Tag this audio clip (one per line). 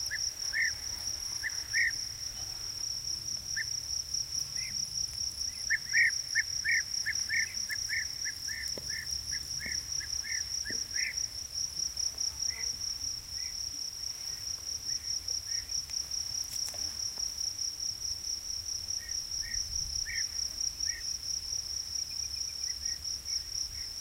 spring
donana
nature
insects
field-recording
bee-eater
birds
marsh